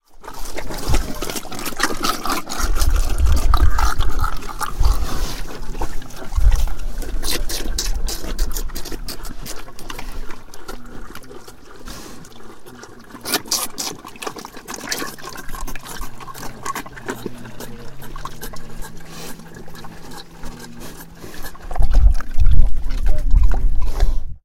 A group of Mangaalitsa pigs are slurping whey from a trough near the village of Merești or Homoródalmás (Hungarian name) in Romania. Two men are talking in the background.